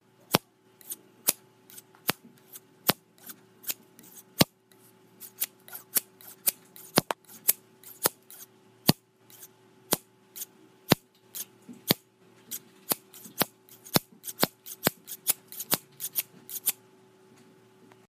Scissors In Air
This is the sound of scissors snapping open and shut in air.
air, appliance, appliances, click, clip, clipping, cut, cutting, field-recording, scissor, scissors, snap, snapping, snip, snipping